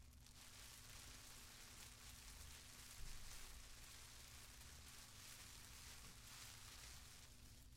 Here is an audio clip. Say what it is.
Es el sonar de una lluvia continua